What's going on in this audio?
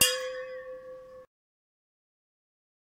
Megabottle - 10 - Audio - Audio 10
Various hits of a stainless steel drinking bottle half filled with water, some clumsier than others.
bottle, steel, hit, ring, ting